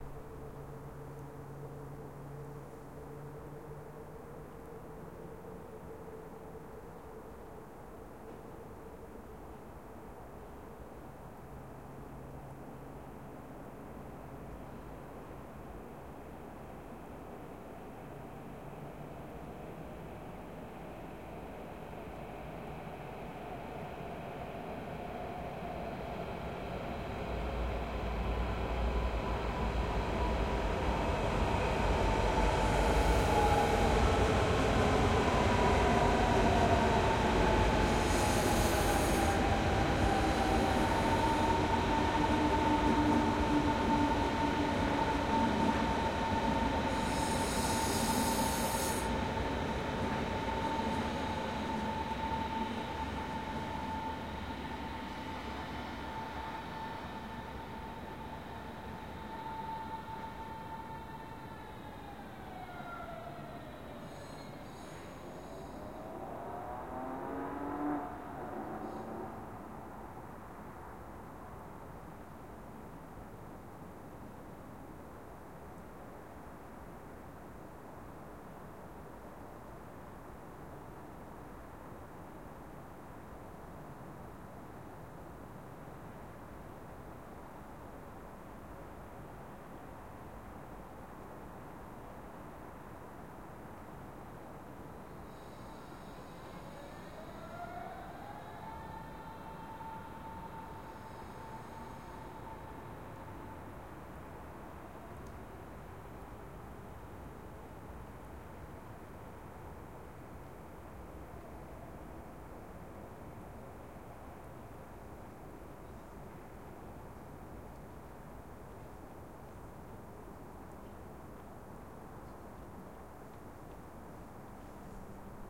S-Bahn Berlin - train passing by in distance, arriving in station
Train passing by in ca 15m distance, arriving in a station to the far right of the stereo field, departing from there.
arrive, depart, train-station, announcement, railway, train, departing, departure, S-Bahn, platform, railway-station, arrival, rail, trains, Berlin, arriving